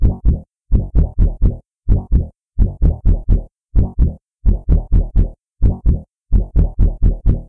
MIDI/OSC lines generated with Pure-Data and then rendered it in Muse-sequencer using Deicsonze and ZynAddSubFX synths.
4, ambient, bpm-128, electro, electronika, elektro, loop, modern, music, new, synth, techno